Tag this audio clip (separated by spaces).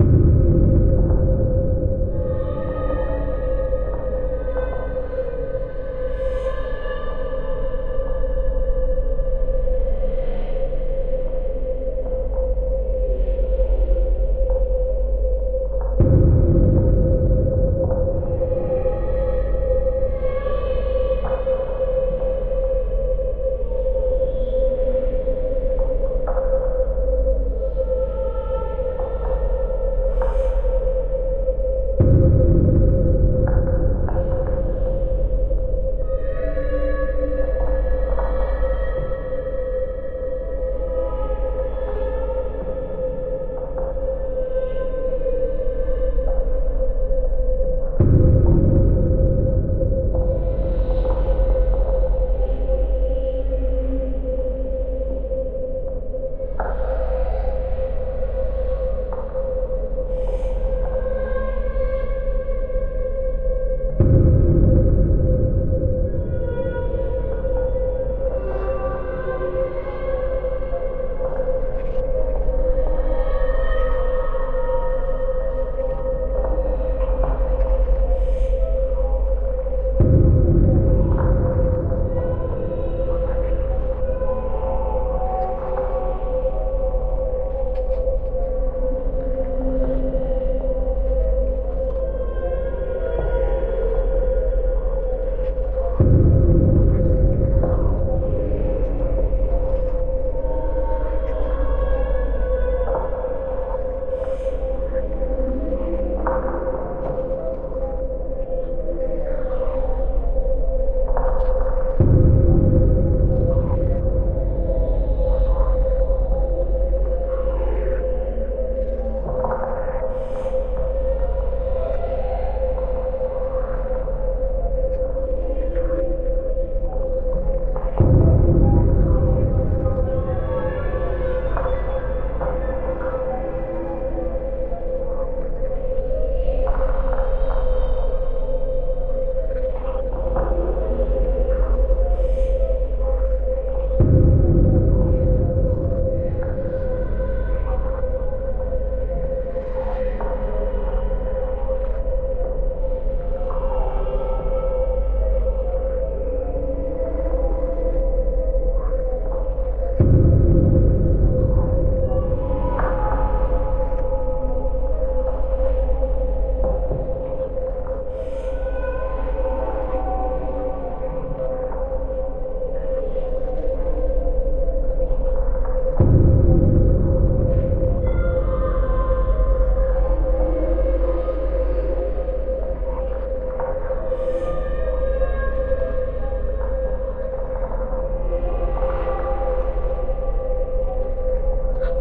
Ambient atmo atmossphere background cold crack Creep Creppy cubase dark game ghost granular halion haunted horrific Horror movie moving padshop sounddesign terrific video